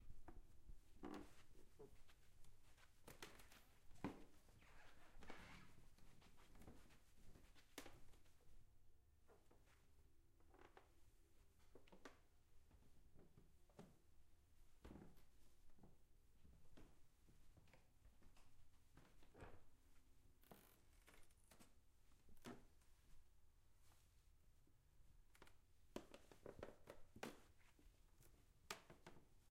creaking wooden floors
Walking on a creaking wooden floor, recorded with a zoom H6
board, Creak, Creaking, floor, floors, squeaky, wood, wooden